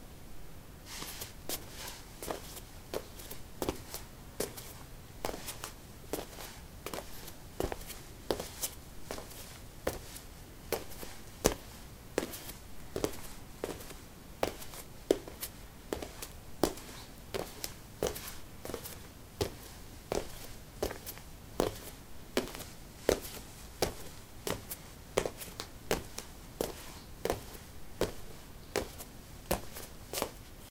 lino 01a barefeet walk
Walking on linoleum: bare feet. Recorded with a ZOOM H2 in a basement of a house, normalized with Audacity.
footstep, footsteps, step, steps, walk, walking